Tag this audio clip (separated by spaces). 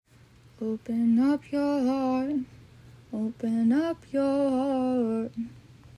vocal voice free speech field-recording melody talk song girl woman female singing